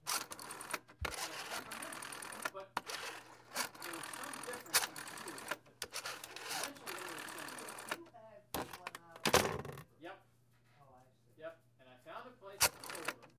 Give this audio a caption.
Dialing my grandmother's rotary phone from the 70's I think. There is some chatter in the background.